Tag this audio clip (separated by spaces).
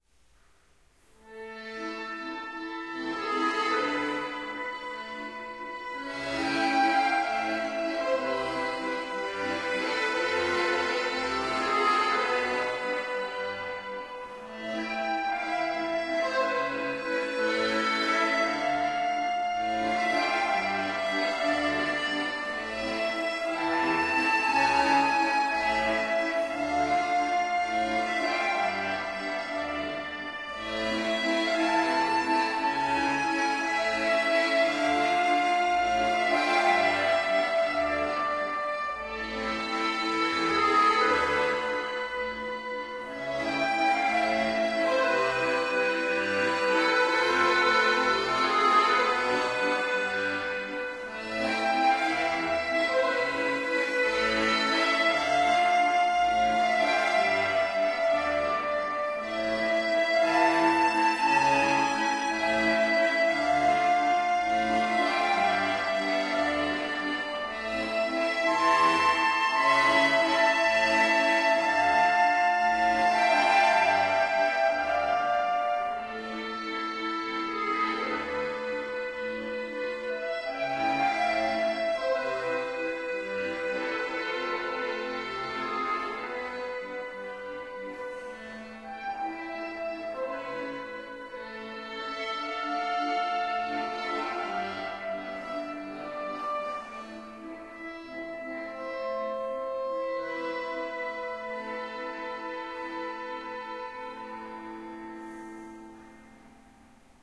accordion loop music